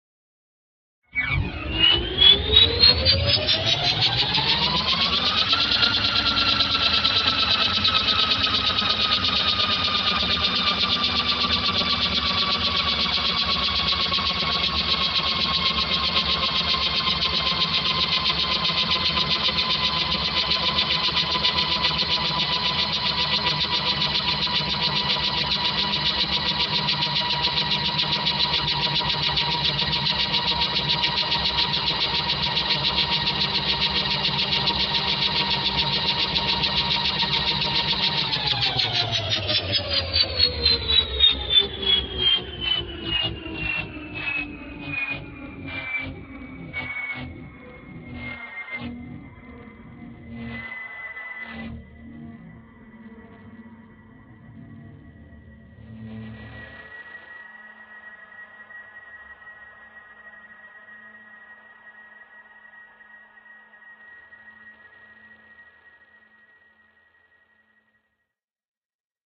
An engine sound from a reaktor synth I built which can produce many different types of engine and mechanical sounds, using the granular synthesis of reaktor 3.
Engine revs up, runs for a bit, then cycles down